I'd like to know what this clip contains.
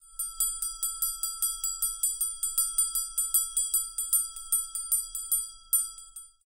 Clear sound of hand operated bell, mostly used in christmas time.